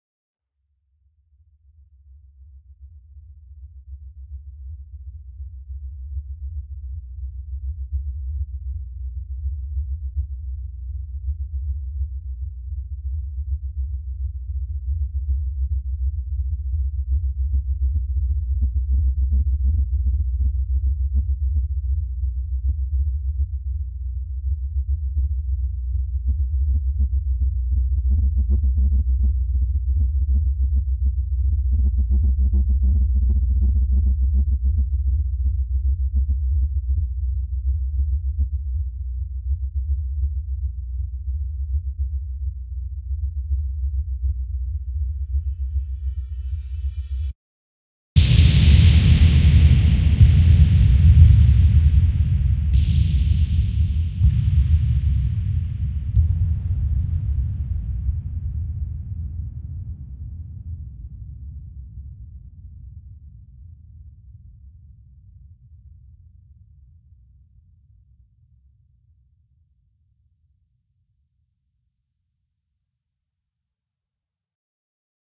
This is my sonic representation of the Big Bang I made for a theater project in Switzerland.